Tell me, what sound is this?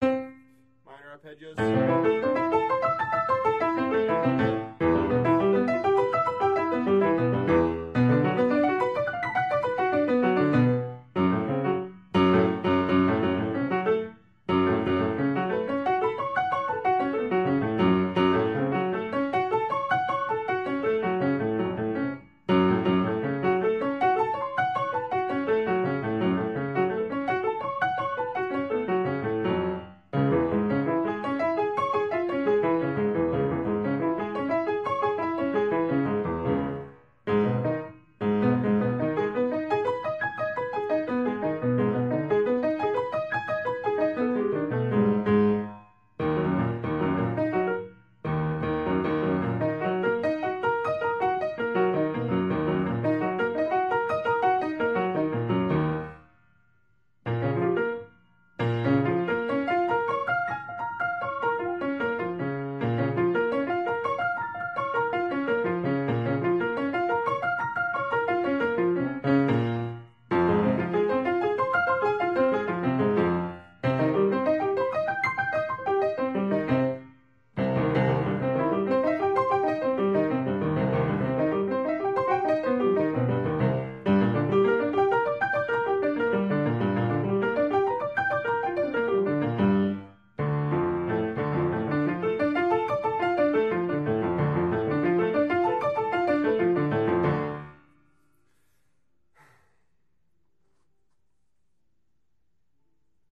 Practice Files from one day of Piano Practice (140502)
Logging, Piano, Practice